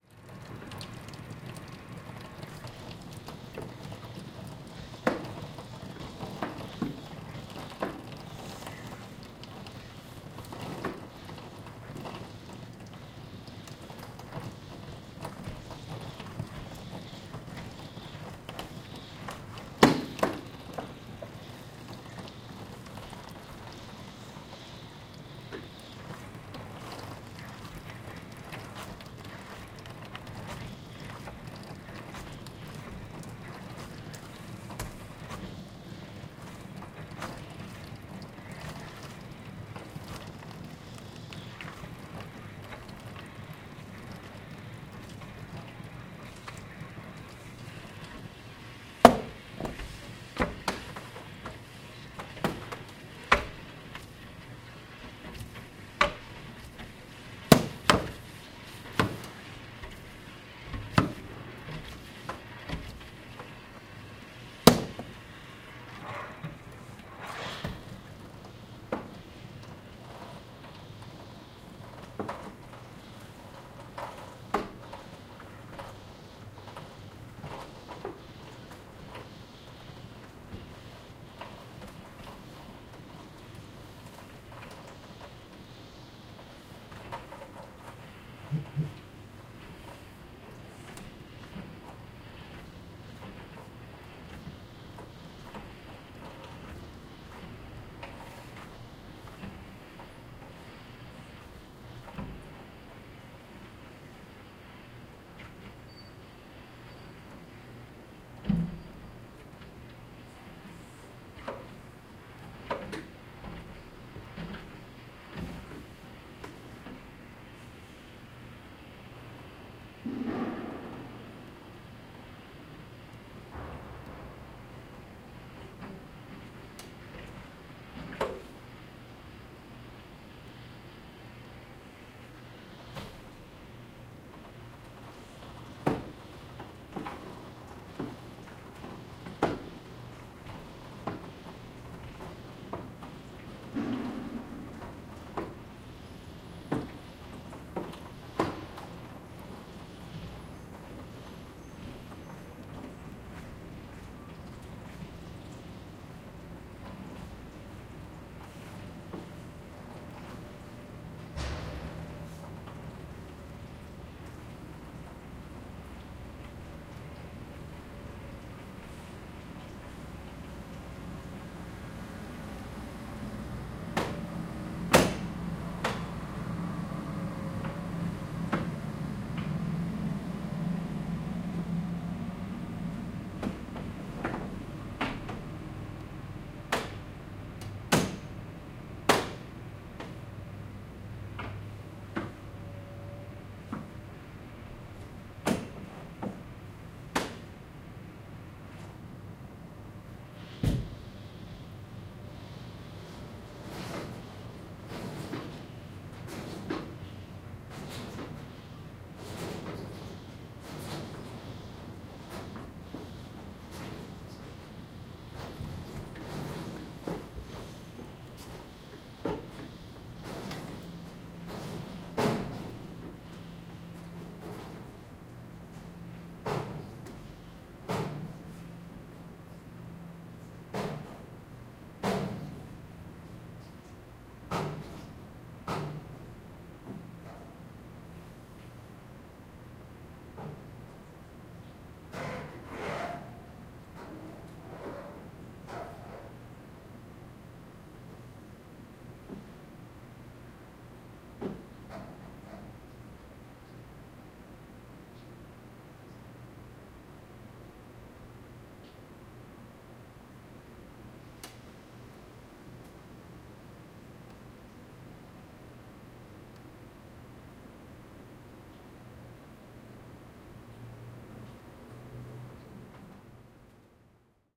Cleaning windows in Couch 201
Mechanical sound from an automatic window washing machine. Recorded from indoors in Couch building (Georgia Tech, Atlanta, GA, USA), on April 8, 2016, with a Zoom H1 Handy Recorder.
field-recording, machines, rhythmic, water